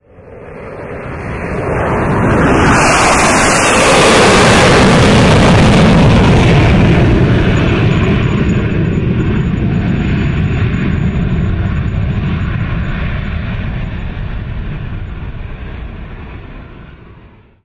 Jet Plane Flyby
A plane flying by the camera.
tech, fighter, technology, bang, military, fast, speed, flying, jet, flight, aeroplane, army, plane, boom, sonic, aviation